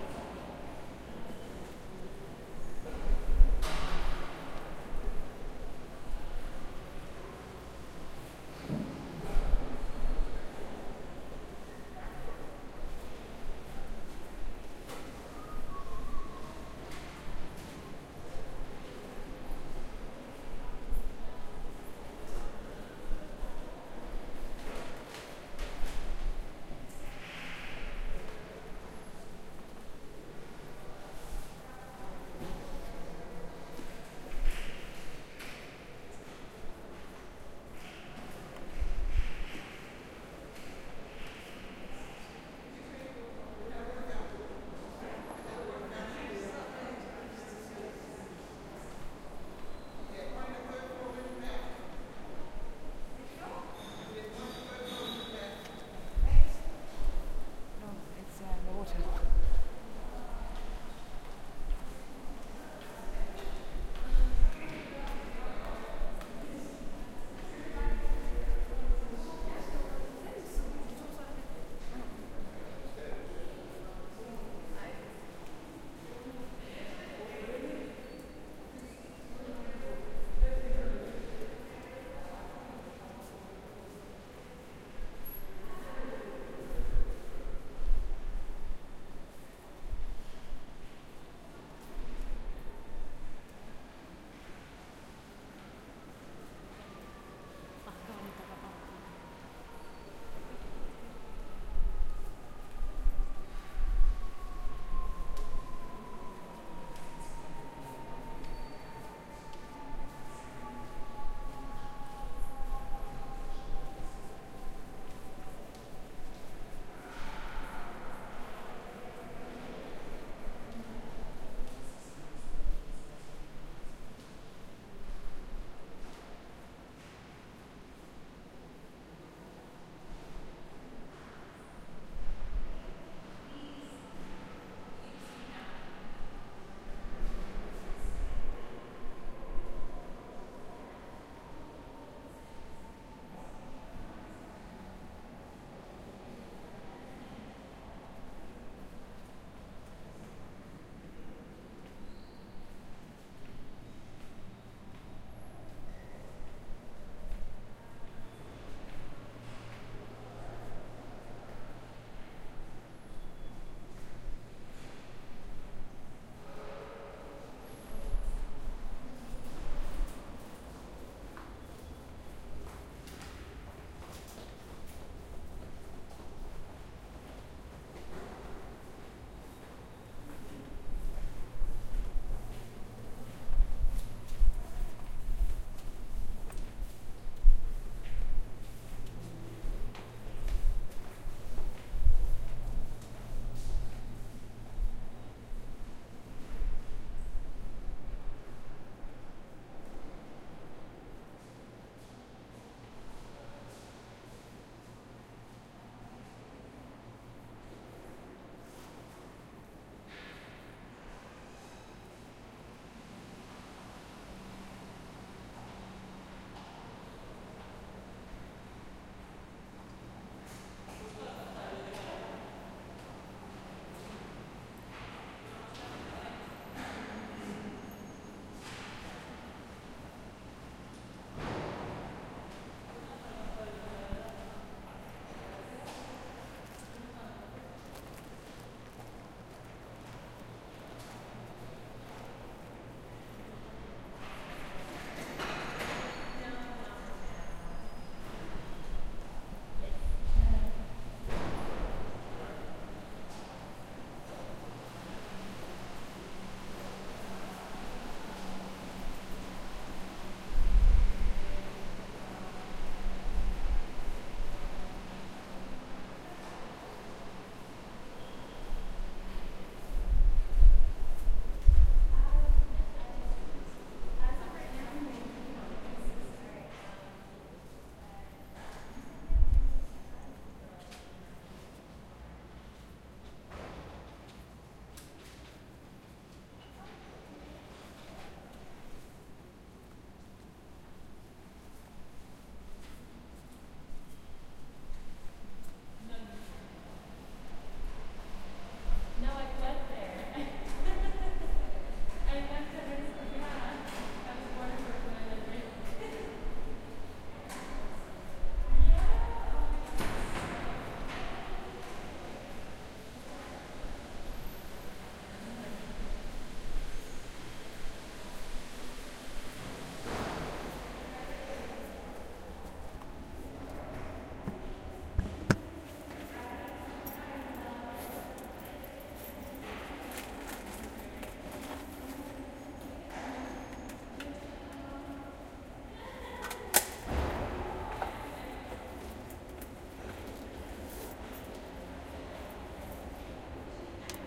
Sort of silence in the New York Public Library